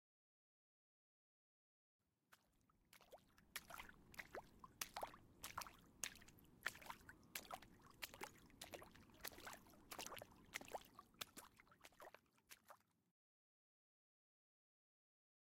Walk - Pud
Walking in a pud
CZ, Czech, Panska